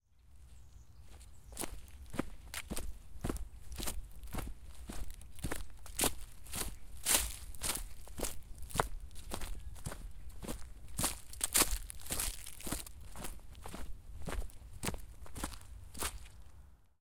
footsteps - pasture 01
Walking on short grass with the microphone held to my feet.
footsteps, grass, outdoors